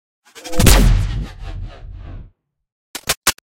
scifi sniper rifle
A sound of a sci-fi sniper rifle and reload. Made in Ableton 10 with the help of Xfer Serum and a bunch of processed and resampled oneshots (which were also made either in Serum, or Granulator, or some other processing tools).
sniper, shot, rifle, sci-fi, weapon, reload, shoot, gun